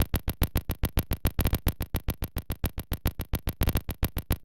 plastikman-pattern-108bpm
glitch, clicks, minimal, 108, plastikman